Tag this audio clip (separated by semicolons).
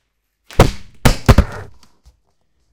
sound-artist; dropping; drop; stupid; fail; fall; problem; breaking